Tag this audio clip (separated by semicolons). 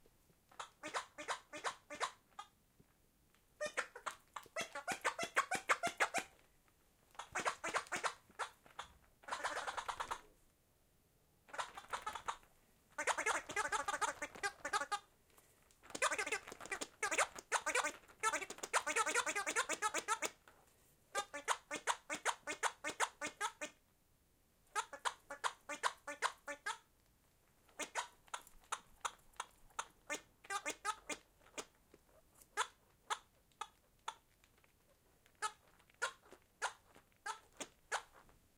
laugh; hiccup; humour; gurgle; toy